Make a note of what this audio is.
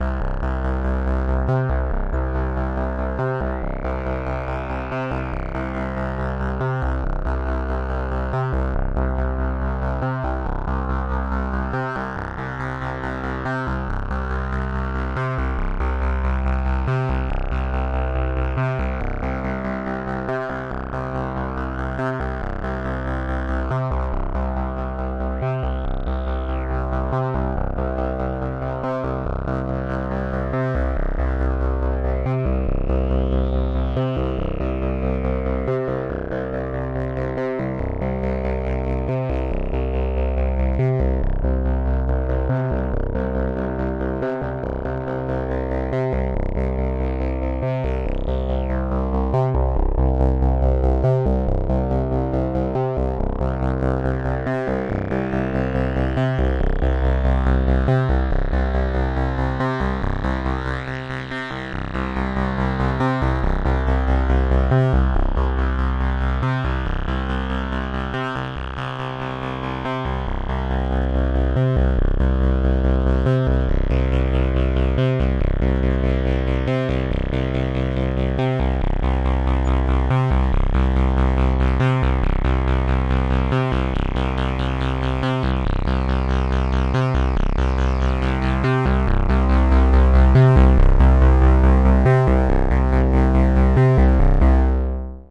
Akai Timbre Wolf C Sequence 140 03
Sequence around key of C from the all analog Akai Timbre Wolf Synthesizer. Moving a few filters in real time.
electronic, synthesizer, synth, sequencer, hardware, analog, Analogue, loop